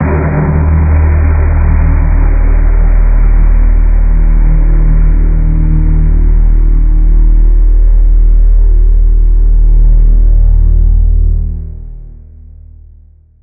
Short Tension
This sound was made in FLstudio with a couple of instruments playing one note at the same time.